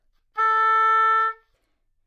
Part of the Good-sounds dataset of monophonic instrumental sounds.
instrument::oboe
note::A
octave::4
midi note::57
good-sounds-id::8000